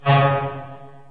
Some Djembe samples distorted
distortion, sfx, drone, distorted